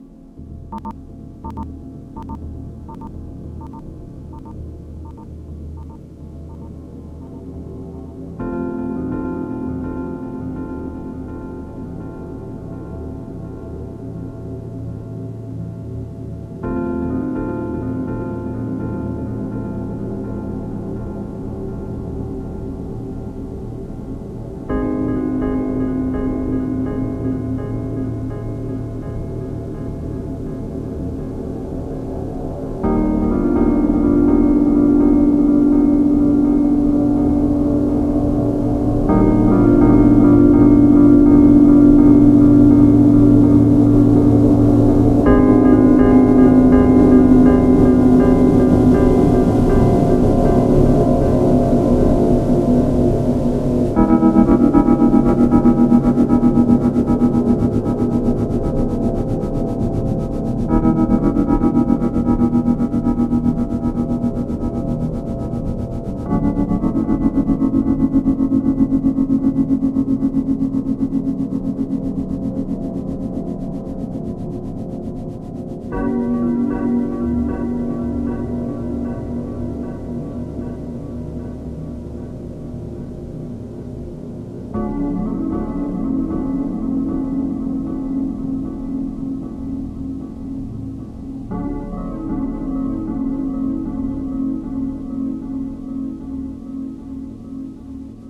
YZ7tomisssomebody
beauty, feelings, longing, mode, sad